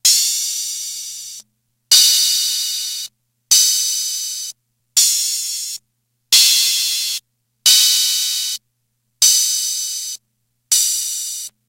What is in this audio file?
1983 Atlantex MPC analog Drum Machine cymbal sounds choked